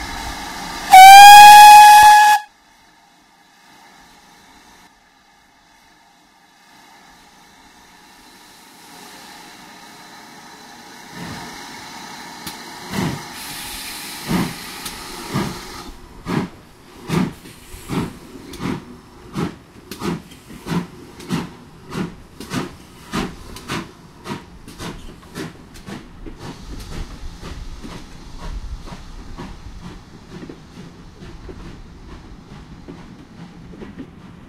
Steam Train 3205 1
Steam engine number 3205 at South Devon Railway (UK) starting out from Buckfastleigh station en route to Totnes, hauling passenger coaches.
The whistle blows, steam hisses, then the engine starts, and the coaches pass.
As the engine picks up speed you get the "I-think-I-can" sound together with the clackety clack of wheels on old style tracks.
Recorded with Zoom H1.